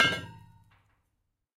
Plastic sewage tube hit 15
Plastic sewage tube hit
tube, Plastic, sewage, hit